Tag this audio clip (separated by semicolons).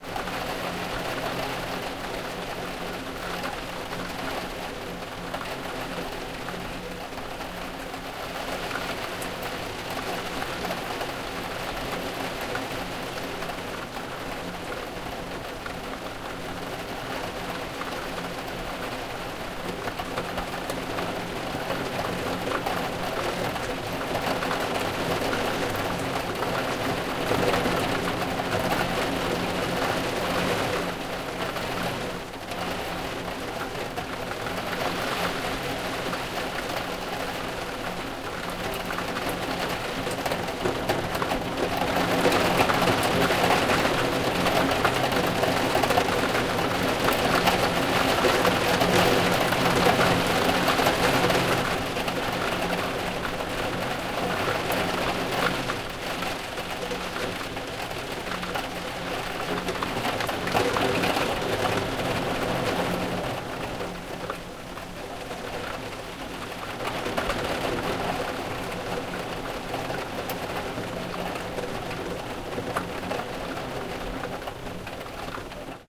water; relaxing; tinnitus; rain; insomnia; window